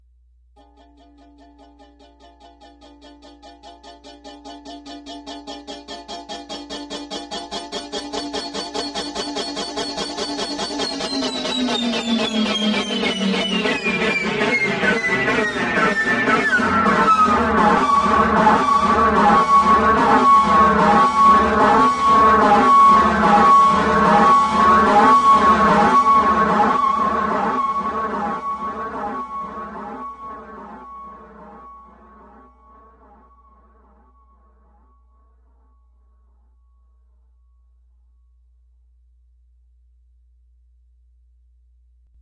Ukulele with a boss chorus and delay pedal. Time shift added in the right channel to add stereo effect
alien, chorus, ukulele